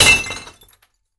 verre brisé broken glass
verre, bris